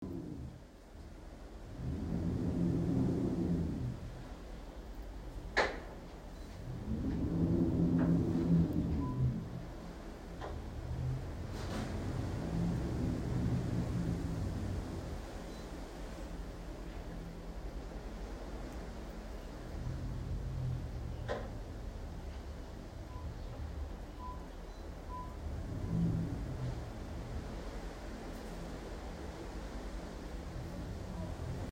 A haunting howling wind
field-recording, haunting, Howling, nature, wind